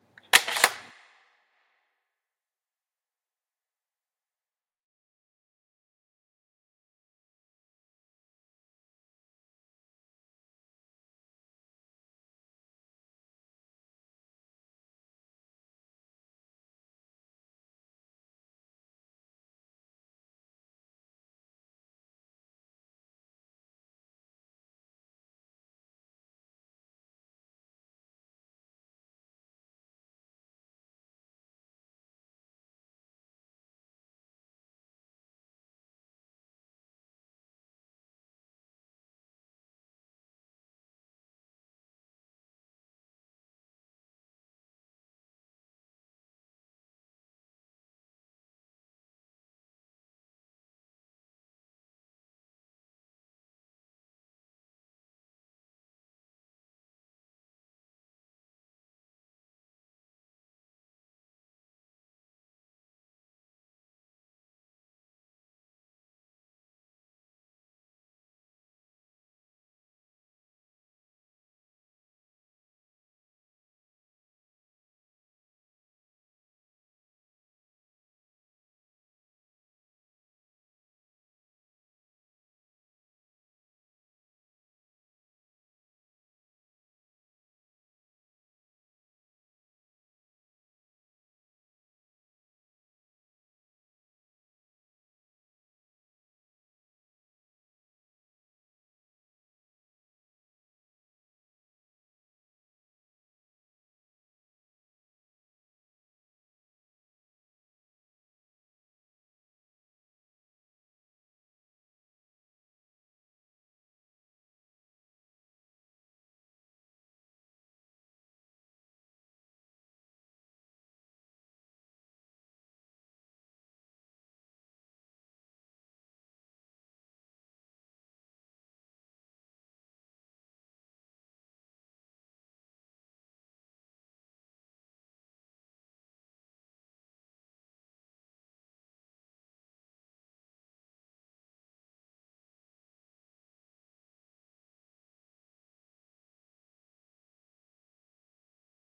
A Remington 700's bolt being brought back. (say that five times fast!)